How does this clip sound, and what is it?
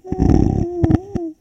Zombie dies 2
A zombie dies
zombie, moaning, hiss, horror, moan, undead, roar, growl